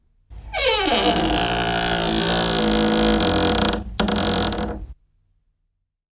Puuovi narisee / A wooden door creaks and squeaks, a short creak.
Puinen ovi, sisäovi, narisee ja vingahtaa. Lyhyt narina.
Paikka/Place: Suomi / Finland / Helsinki, Seurasaari
Aika/Date: 1957
Creak, Door, Field-Recording, Finland, Finnish-Broadcasting-Company, Narina, Ovi, Puu, Puuovi, Soundfx, Squeak, Suomi, Tehosteet, Vingahdus, Wood, Yle, Yleisradio